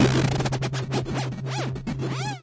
Puch a enemy with a wave
space-war, laboratory, laser, damage, video-games, computer, games, push, arcade, robot